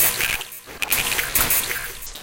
tweaknology wet-eleqtriq
made with black retangle (Reaktor ensemble) this is part of a pack of short cuts from the same session